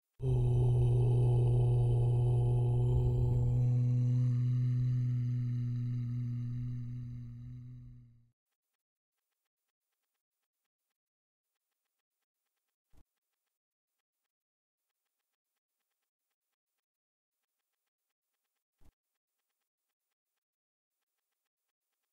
This mantra is for peace